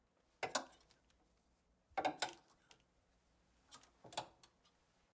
Hanging Up Clothes